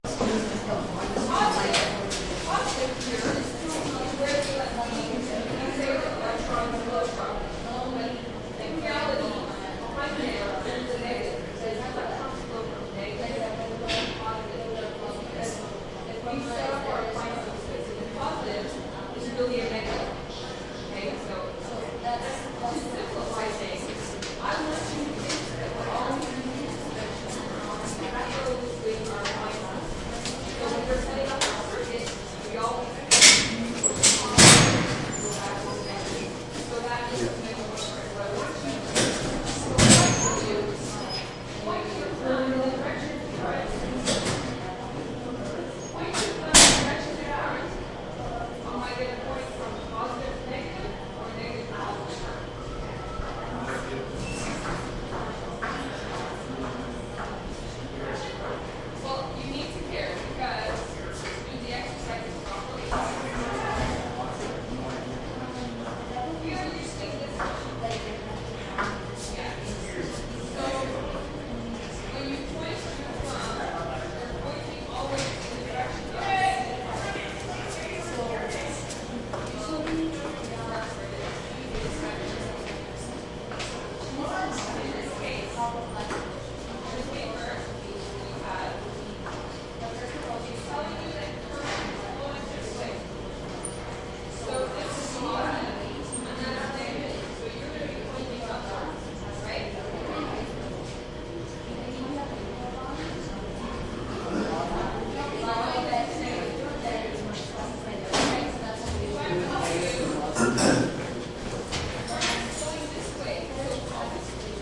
high school hallway lockers voices science class and water fountain or power hum Montreal, Canada
Canada, class, hallway, high, lockers, school, science, voices